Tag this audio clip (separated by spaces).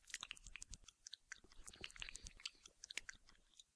paste cream toothpaste